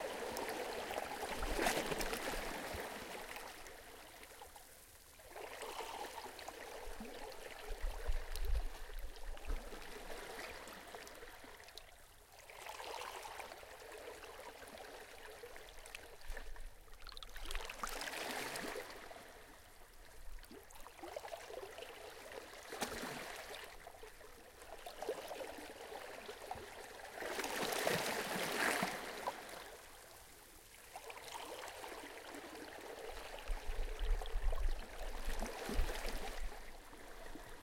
water waves rocks
ocean waves lapping gently on the rocks, slight sound of sea foam, captured with a zoom h1
ocean, sea, shore, waves